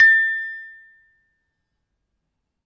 Sample pack of an Indonesian toy gamelan metallophone recorded with Zoom H1.